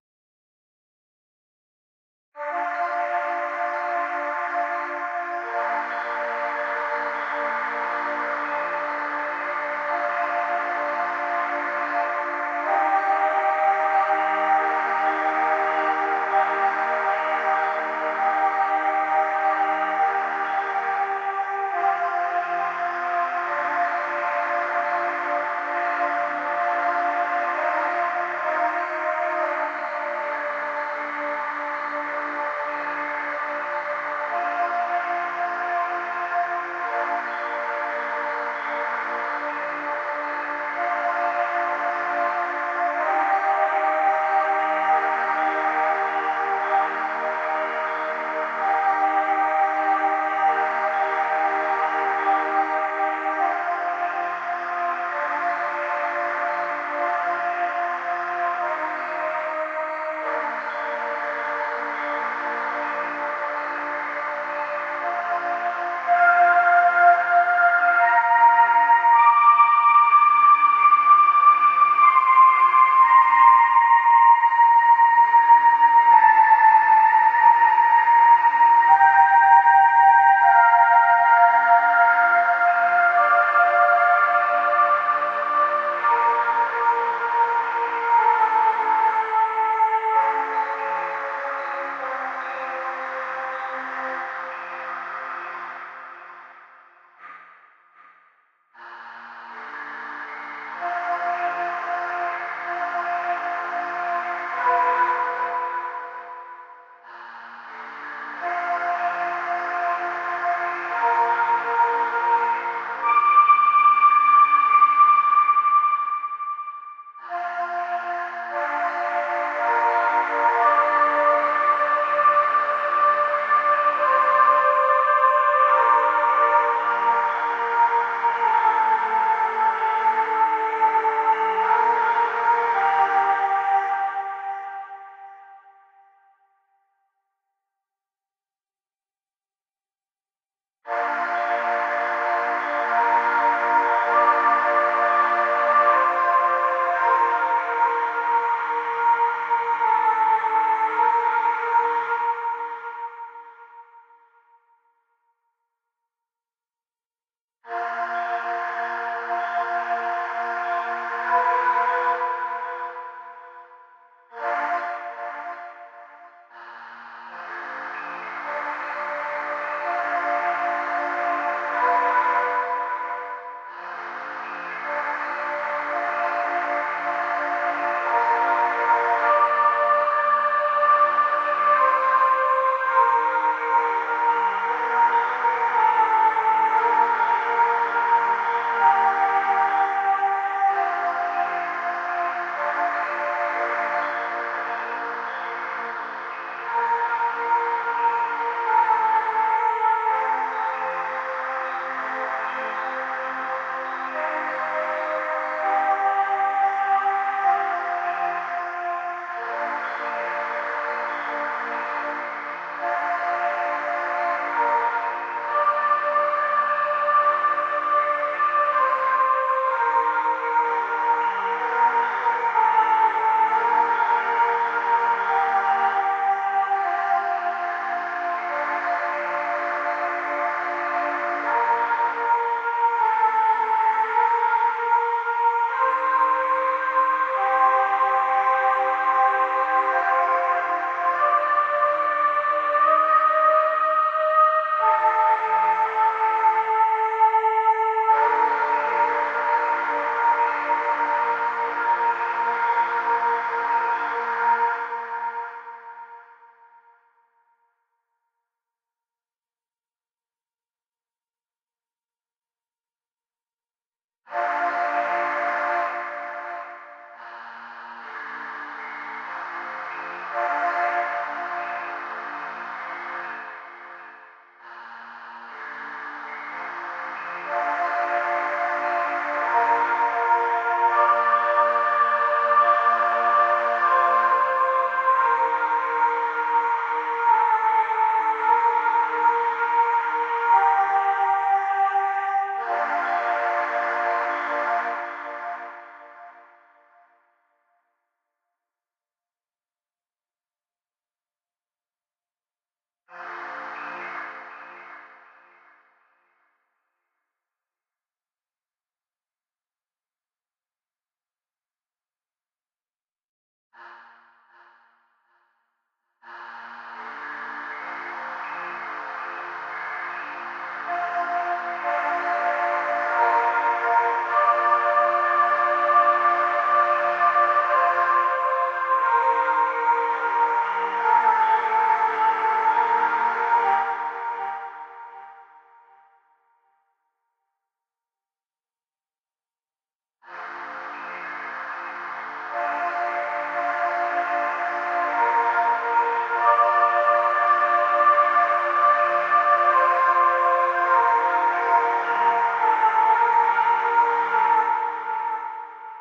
Spacial Hymn
Playing my keyboard, slow notes of the hymn "How Greeat Thou Arst" Used it for background for narration of a video about the universe.
calm, christian, hymn